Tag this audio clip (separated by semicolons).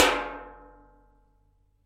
atonal; metal; percussion